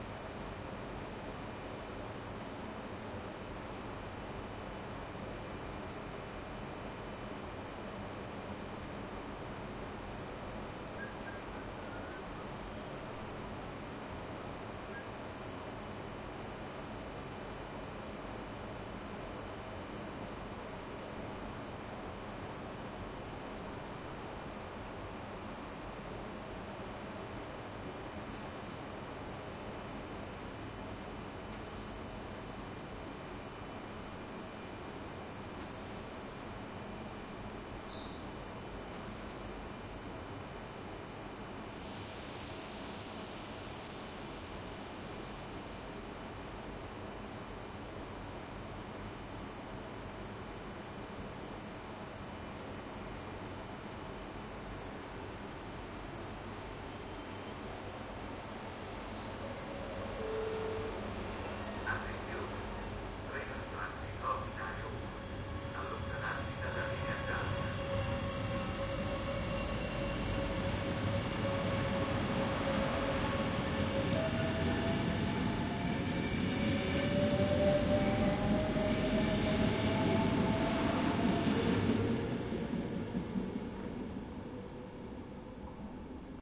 080909 06 railway station train
recorded on railway station, train is stopped than go with railway atmosphere
railway, station, train